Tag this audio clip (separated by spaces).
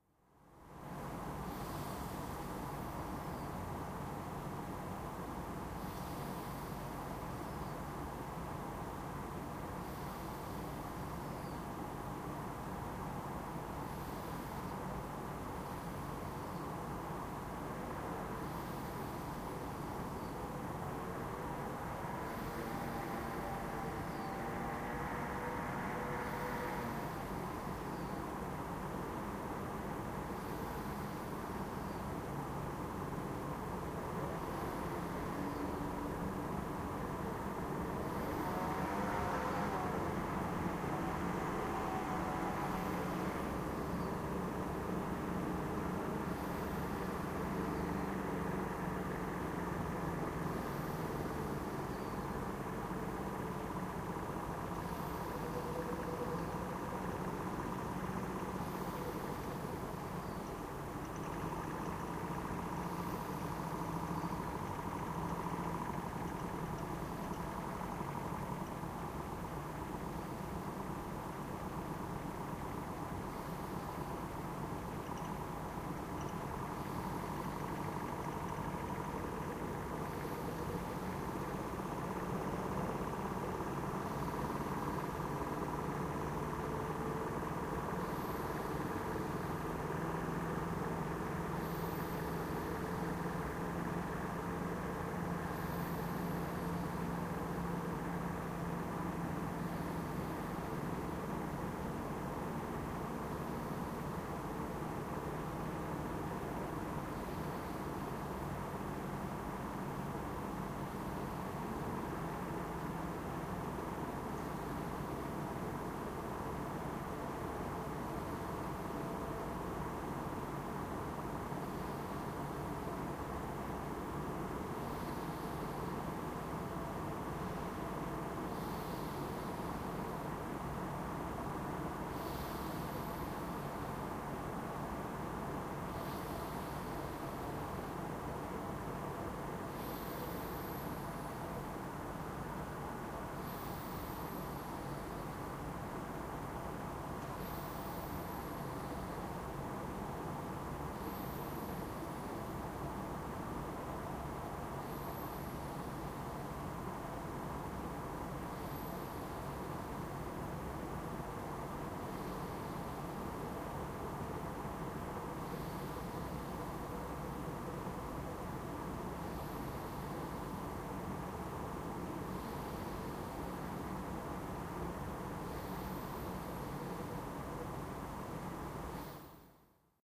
bed breath engine field-recording human street street-noise traffic